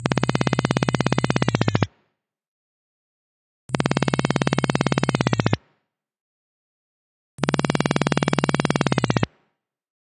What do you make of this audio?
Futuristic motorcycle engine sound (3 pitches)
A sound for some futuristic levitating motorcycle engine, for Thrive the game. Made from scratch putting a random image in Harmor and experimenting with the knobs. I used other plugins from Image-Line too. All in Fl Studio 10.
It has a little of reverb.